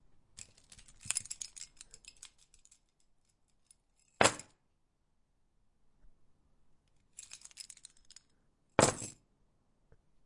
Keys being dropped on Wooden Tabel
keys being dropped on a wooden table
clank, key, keys, owi, ring, table, wooden